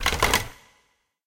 mehackit phone 2
Old retro phone mechanical noise sound for Sonic Pi Library. Part of the first Mehackit sample library contribution.
effect, electric, mehackit, noise, phone, retro, sample, sounddesign, telephone, vintage